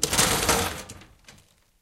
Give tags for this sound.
chaotic
clatter
crash
objects

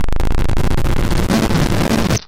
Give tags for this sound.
harsh
pulse
broken
mute-synth
digital
electronic
noise-maker
square-wave
rough
low-pitch

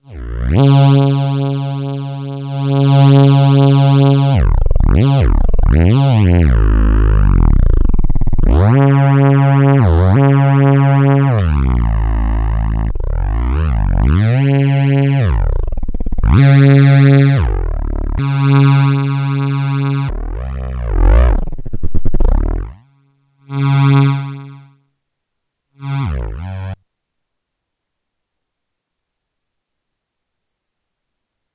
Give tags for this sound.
sample
sound
mousing
free

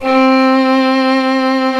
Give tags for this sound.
arco
keman
violin

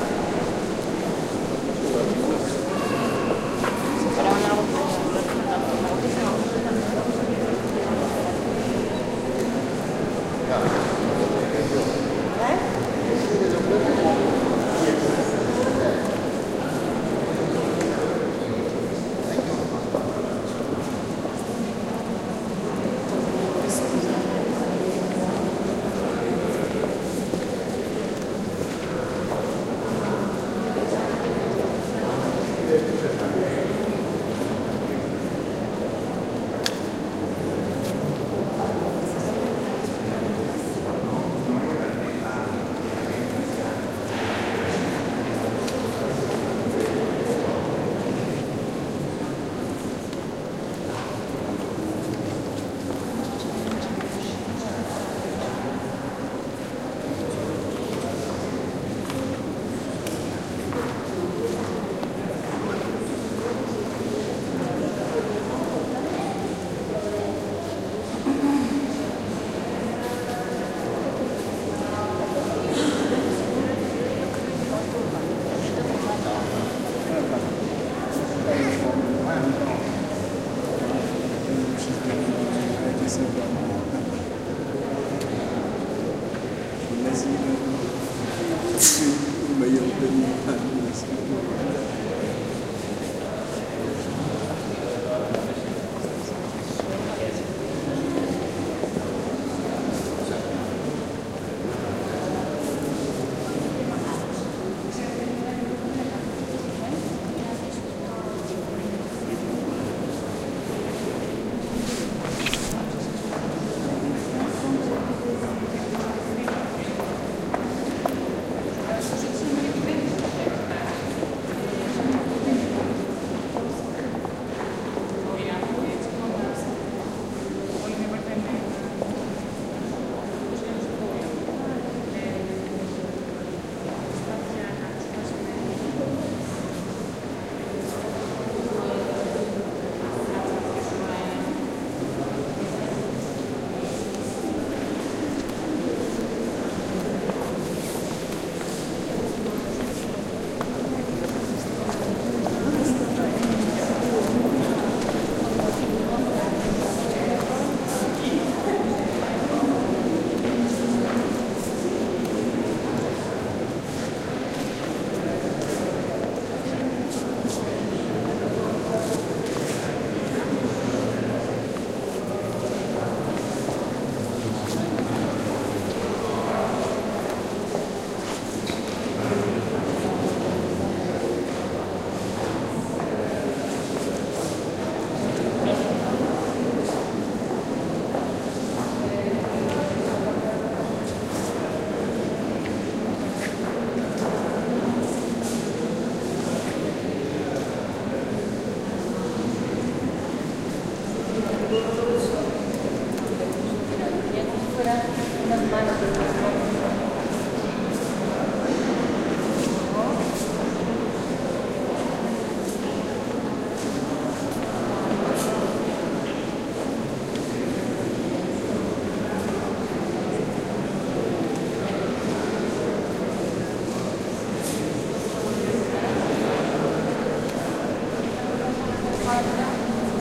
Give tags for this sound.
ambiance
field-recording

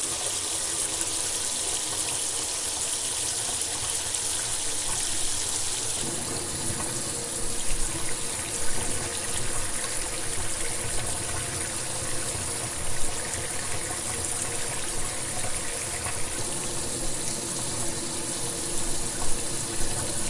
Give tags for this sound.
Water
drain
Water-single-stream-sink

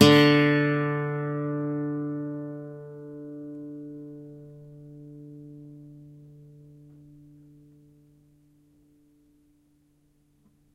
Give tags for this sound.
nylon-string
stereo
acoustic-guitar
pluck

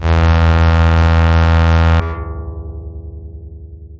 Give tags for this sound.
pad
saw
reaktor
multisample

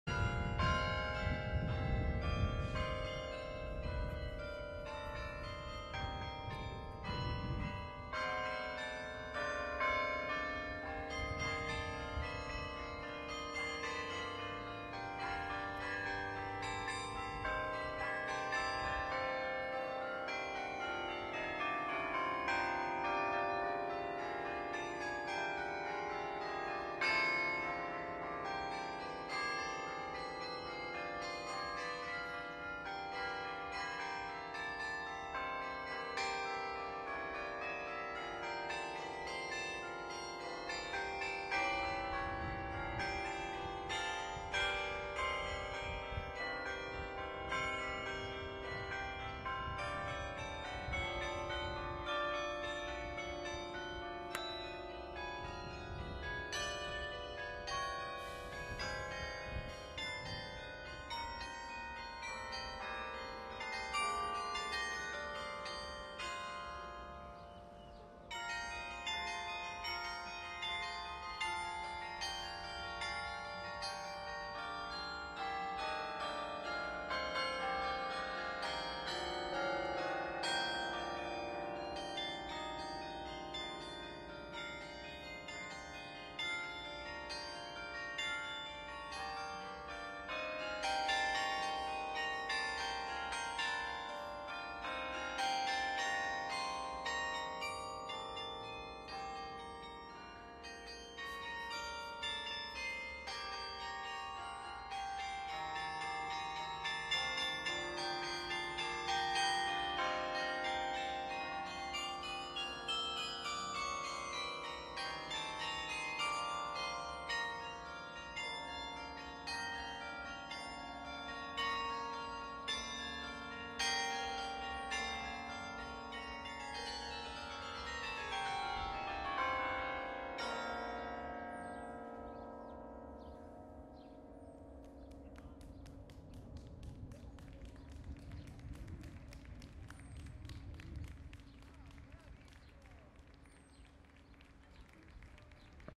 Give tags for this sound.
field-recording
city
bells

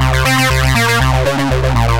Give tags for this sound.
electronic
noise
industrial
experimental

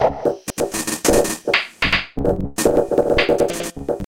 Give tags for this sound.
digital
random
glitch

